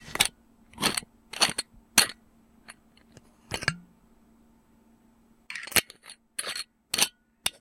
Opening and closing a whiskey bottle